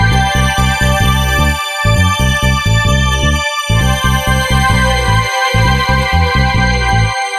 80s retrowave loop made in FL Studio.
2023.
cool, harmony, pop, bass, vintage, guitar, 80s, rock, retro, loop, groovy, theme, electronic, synth, film, loops